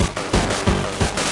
7x7tks&hesed4(45)
707, beat, bend, drum, loop, modified